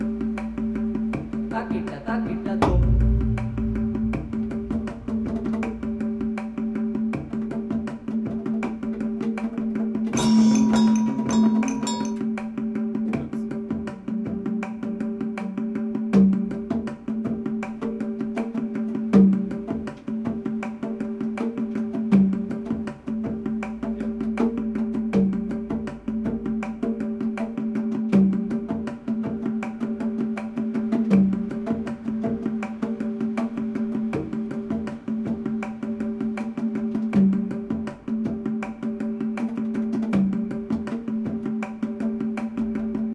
Mridangam in electroacoustic music
This is a recording made in a rehearsal session for an electroacoustic orchestra, where a mridangam is played throughout against the backdrop of an ambient soundscape of electro-acoustic orchestra.